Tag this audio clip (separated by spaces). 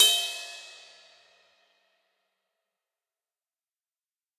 cymbal stereo